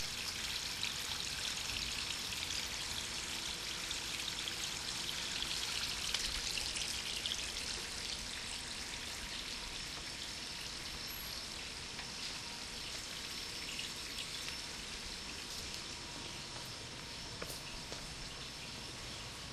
The fountain outside at the hospital emergency room recorded with DS-40.